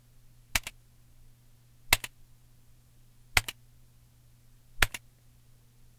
tapping keys on a computer keyboard
key, MTC500-M002-s14, computer